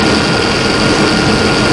toy car motor engine loop sound
In my quest to find a decent car motor loop for Trigger Rally, I discovered recordings of a graphics card and a hard drive from my personal library, which I mixed into a rather weak engine loop